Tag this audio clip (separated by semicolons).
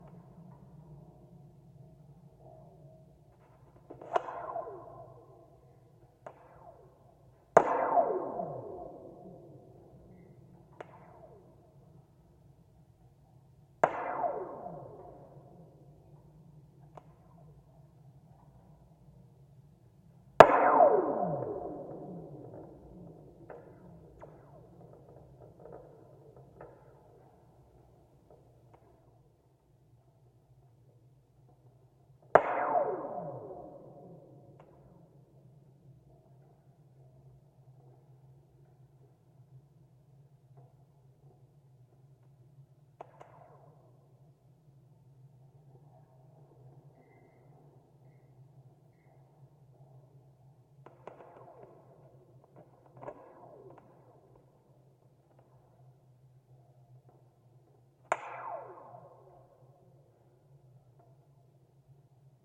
Schertler; radio; sample; contact; WNIU; tower; field-recording; wikiGong; since-demolished; stays; DeKalb; sony-pcm-d50; cable; Illinois; DYN-E-SET; contact-microphone; NIU